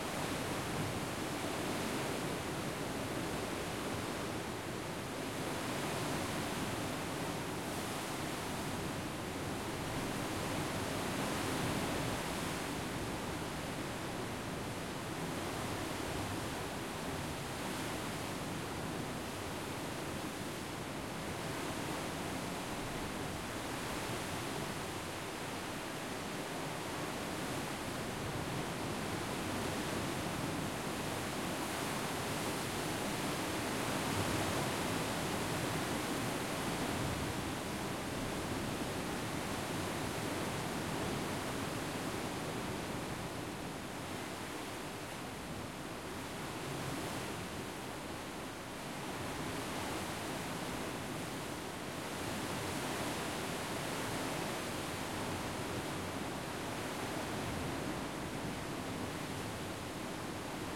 Cantabrico Sea from a Cave in Asturias
Sea sound from the Cantabrico Sea, inside Cobijeru Cave (Asturias-Spain).
Sonido del Mar Cantábrico desde el interior de la cueva de Cobijeru (Asturias- España).
Sea, Cave, Waves, Asturias, Cueva, Cantabrico, Water